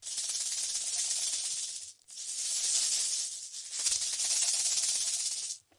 Rattles sounds, made with a shaker!
grains, noise, rattle, rattling, rythm, shake, shaked, shaker, shaking, snake, waggle